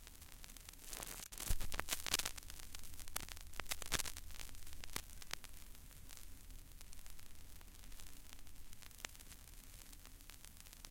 Vinyl Dust 50
vinyl crackle yo
Vinyl, crackle, dust, hiss, noise, static, turntable